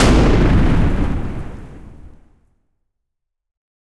Large explosion sound created by mixing recorded layers of a dumpster hit and thunder.